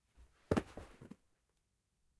Body falling to floor 6
A body falling to a carpeted floor, can also be used for hard outdoor ground.